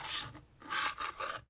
Recorded knifes blades sound.
knife, blade, vibration, percussion, field-recording, glitch